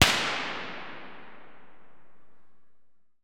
Flash 1,2MV 2
Buzz, electric, electric-arc, electricity, high-voltage, jacob, laboratory, s-ladder, tesla, unprocessed, volt, voltage